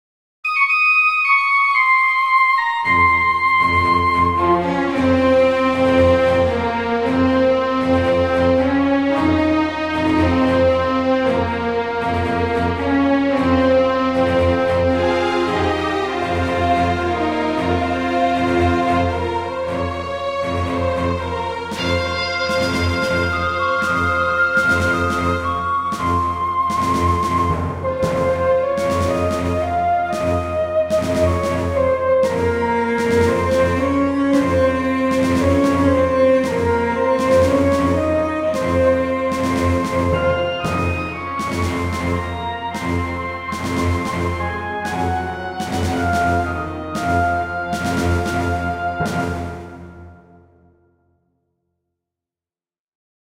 Path of a Warrior (Remake)
Ost, Game, Anime, Sound, Movie, Soundtrack